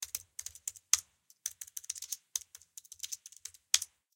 Keyboard Typing Tapping
A short sequence of typing on a computer keyboard